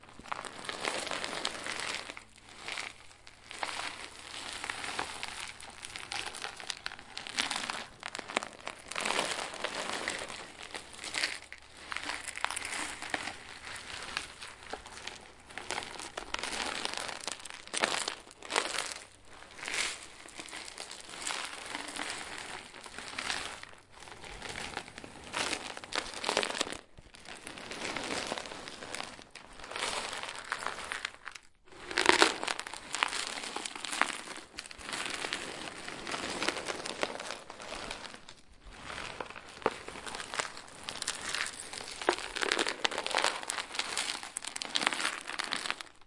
Foley sound for whatever you need. (New Zealand)